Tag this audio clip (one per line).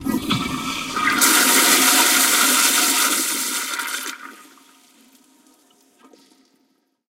toilet
glug
gurgle
flush
wet
water